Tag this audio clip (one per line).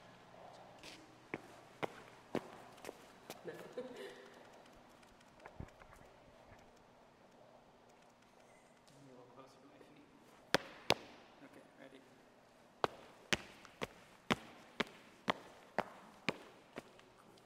echo
sound
narrative